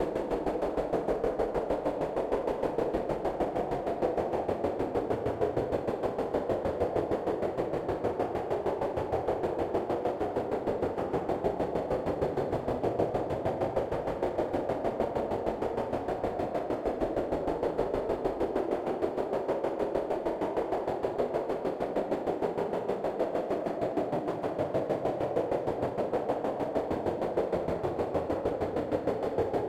synth steam engine
Synthesized sound of a steam engine or train. Made with Ableton Live, starting from a distorted sine wave looped and processed with Live clip parameters of pitch and grain size. Reverb was added.